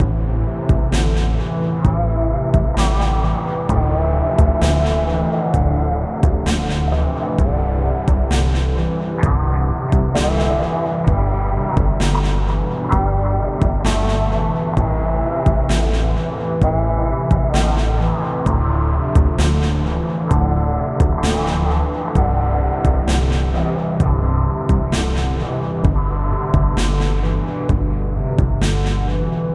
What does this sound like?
kinda a vapor-y inspired bit of music for a project of mine. i don't know if i will use it or if you will find it useful, but i thought i might as well upload it.
made in fl studio in a few hours, with vocals provided by me. they have been pretty destroyed tho, to be kinda incomprehensible. there's no samples used otherwise.